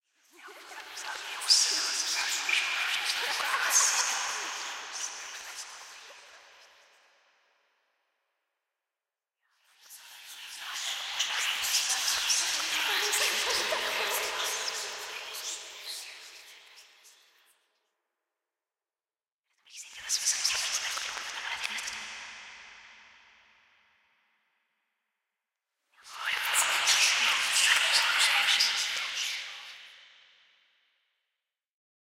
Ghost Whispers
Recording of serveral people whispering, open panned and with reverb added.
Performed and recorded by the students of the Sound Design Workshop, from the Video Games and Animation career, Playa Hermosa, Maldonado, Uruguay.
Focusrite 2i2 3rd Gen
Sennheiser MKH50
crazy, creepy, fearful, ghost, ghostly, Halloween, hallucination, haunt, haunted, hell, insanity, loon, mind, nightmare, paranormal, phantom, psycho, scary, sinister, spectre, spooky, whisper, whispers